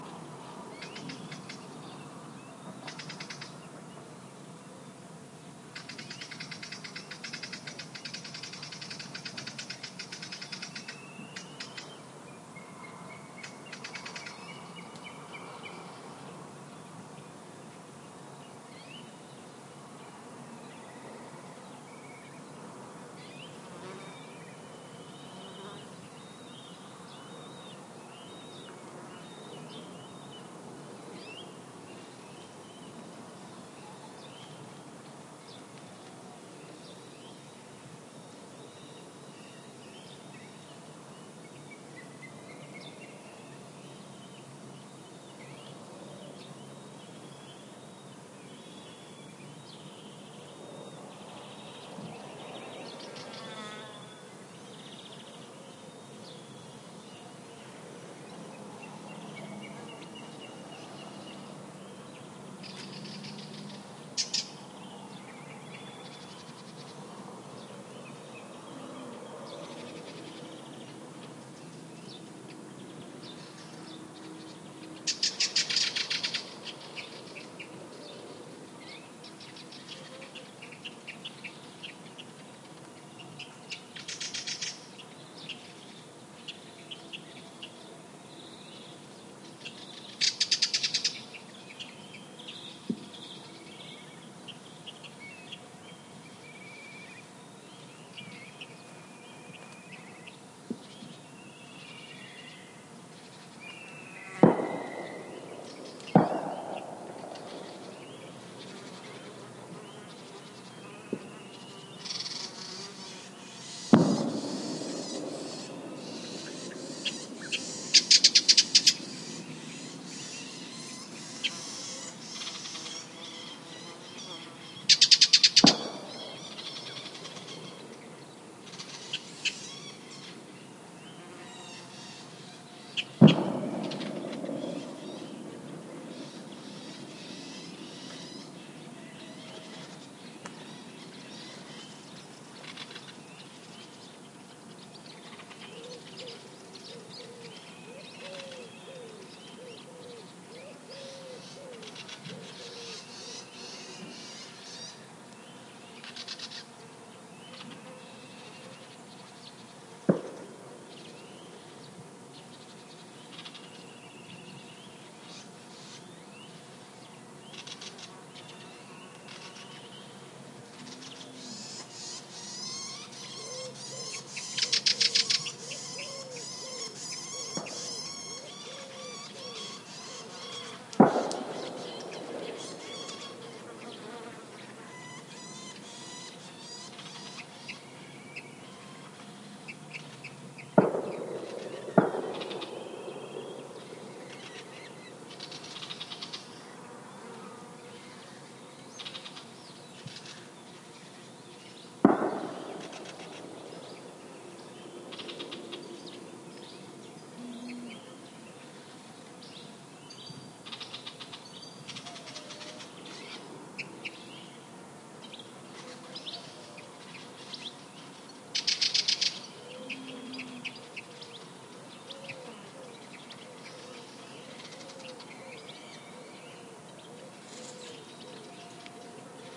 20070722.pinar 0915am
part of the '20070722.pine-woodland' pack that shows the changing nature of sound during a not-so-hot summer morning in Aznalcazar Nature Reserve, S Spain. Trailing numbers in the filename indicate the hour of recording. This sample includes bird calls (mostly warblers, pigeons, jays and some Black Kite) and distant gunshots. Few insects near the mics. Some wind on trees.Explore it yourself.
ambiance, birds, donana, environmental-sounds-research, field-recording, forest, insects, nature, south-spain, summer, time-of-day